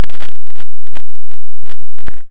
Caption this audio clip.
Scraping pebbles
This sound is made by conbining 3 sounds and apply a lot of effects. Made in Caustic 3 on Android.
Sound-Design, Pebbles, Scraping, Scrape